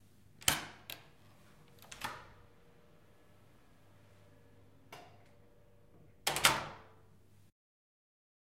DOOR OPEN CLOSE-004
Door Open Close
Close, Door, Open